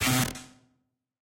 Another glitchy computer sound. Messing around with pulse width.
glitch, oops, pulse, what